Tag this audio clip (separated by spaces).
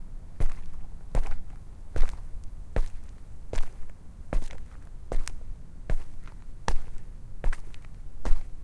foot-steps footsteps feet concrete walking steps